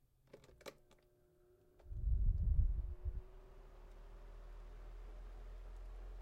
A fan switched on. Plastic button.
fan, indoors, switch